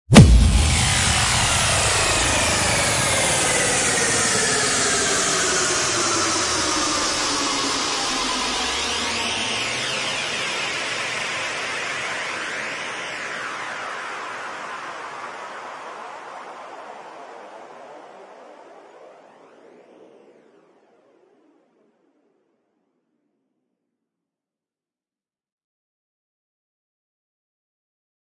Boom Drop
Samples used - Vengeance FX (In layers [entirely my impact Design]) and mod my apology's for uploading this way too early a day.
Bomb, Boom, Crash, Fall, Landing, Metal, Morph, Morpher, Shot, Space, tink, Tool